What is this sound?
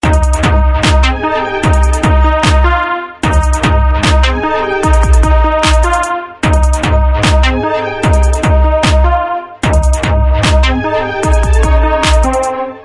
This is another Clubby type beat made by me recorded at 150bpm.